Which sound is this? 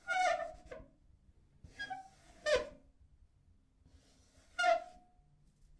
Balloon Screetches
Stroking a wet balloon - Zoom H2
balloon, screetch, high-pitch